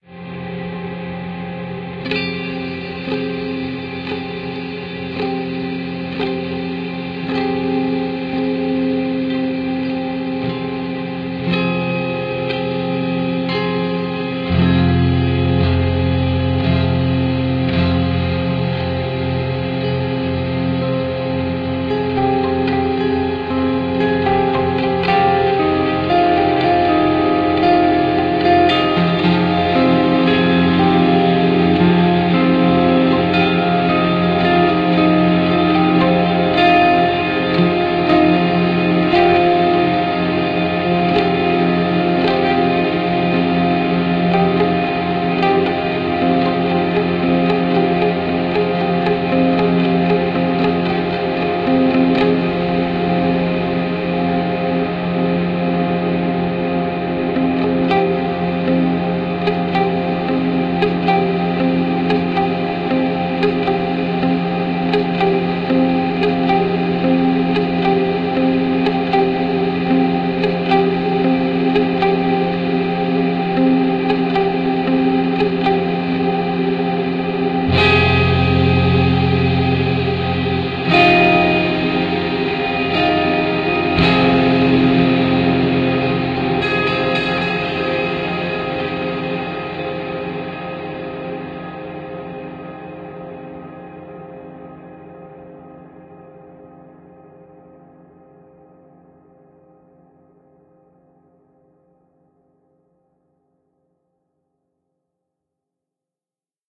Direct recording of my Jackson SL-3 Soloist electric guitar through a Line 6 Pod X3, using its internal reverb effects. (Large Hall reverb with decay at 99%, or maybe even 100% for that endless washed out effect)